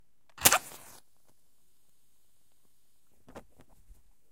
Match Striking and Extinguishing (1)
In this series of recordings I strike many Cook's safety matches, in a small plaster-boarded room. These sounds were recorded with a match pair of Rode M5 small diaphragm condenser microphones, into a Zoom H4N. These are the raw sound recording with not noise reduction, EQ, or compression. These sounds are 100% free for all uses.
burning, fire, flame, match, matchbox, Rode, strike